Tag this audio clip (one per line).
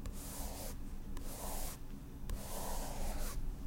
brush
piant
slow
strokes